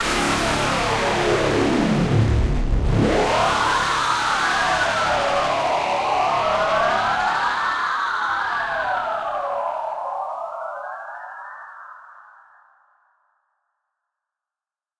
awful scraping v18o
An awful scraping that disappears with a diffuse whirring sound. Special effect for movies and games.
awful, brake, dreadful, hard, metallic, noise, pitched, scrape, scratch, screech, sharp, terrible, whirr